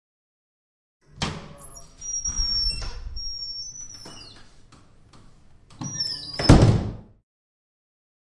This sound shows a door opening when someone pass through it and then closing.
It was recorded in the toilets of Tallers building in Campus Poblenou, UPF.